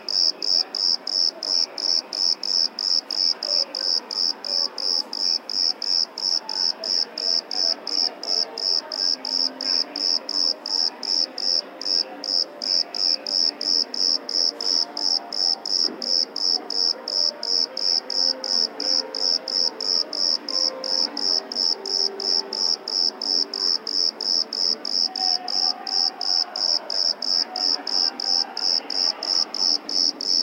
20070724.cricket.city.02
strong cricket call with soft city noise in background, mono recording.